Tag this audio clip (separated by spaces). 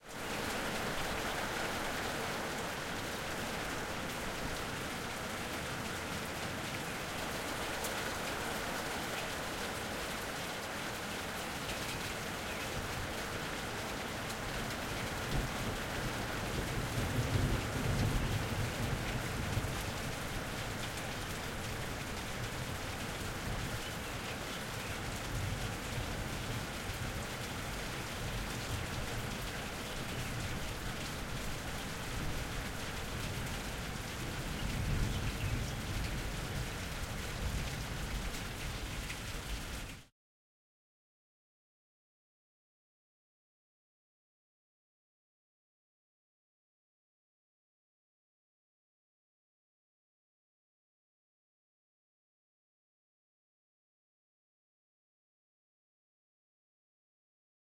thunder field-recording birds rain suburban